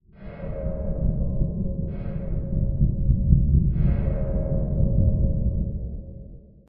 Eerie Ambience
An eerie atmosphere that I fabricated using two sounds I modified for use in a sound project.
--- CREDITS ---
Sounds downloaded and Modified
Metal ping by: timgormly
Ambient Echo by: waveplay
Scary, Fantasy, Sound-Design, Creepy, Eerie, modified, Ambience, Environmental, Atmosphere